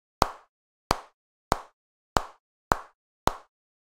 bowling pins stereo
recorded myself knocking a couple bowling pins together in my garage.
Nady SCM-2090
Focusrite Saffire Pro 24 interface/preamp
MacBook Pro
normalized in Soundtrack Pro
no other processing
snare, pins, bowling